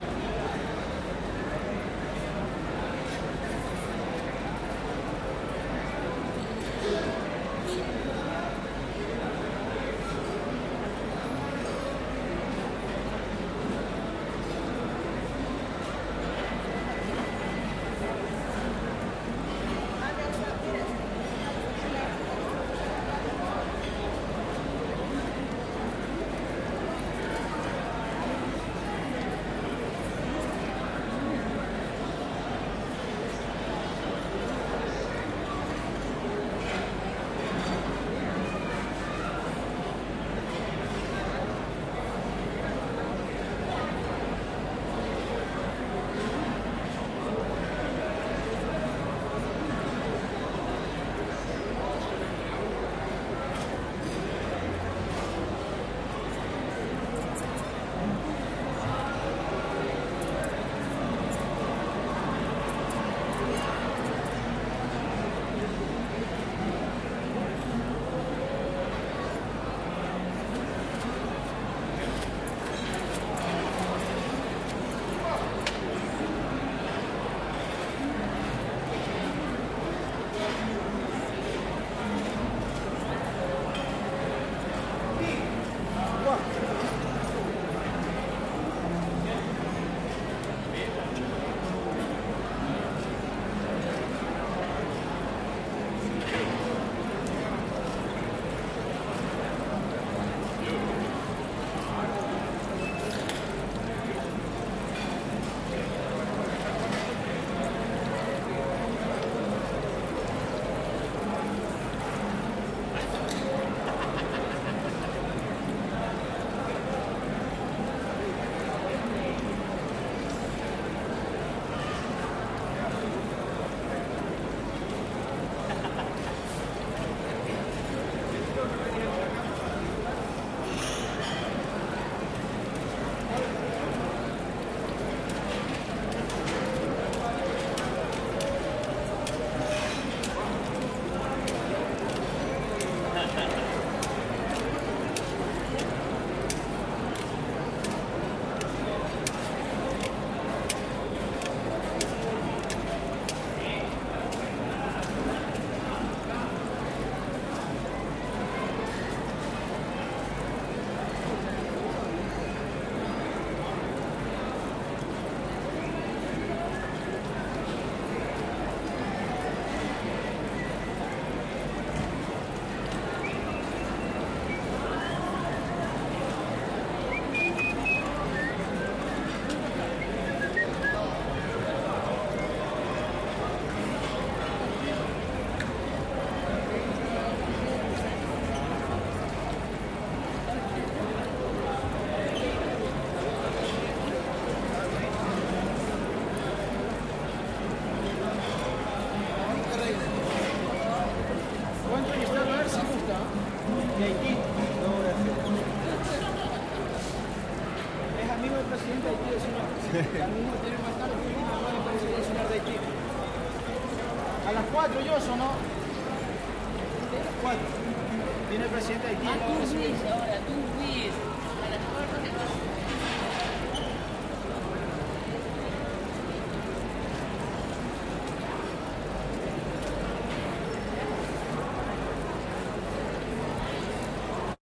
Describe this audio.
mercado central 06 - pileta
Mercado Central, Santiago de Chile, 11 de Agosto 2011. Dentro de los restoranes.
central, chile, food, market, mercado, pileta, restaurant, santiago, sea